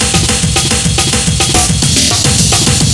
03.11 loop amen rif 5
loop gutar break rif meak in FL studio 10
with me snare and break sample
gitar, postcast, studio, rif, amen, loop, core, fx, noise, breakcore